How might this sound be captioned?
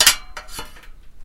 records, oneshot, punch, zoom,